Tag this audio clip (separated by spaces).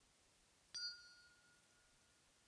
corto ffg timbre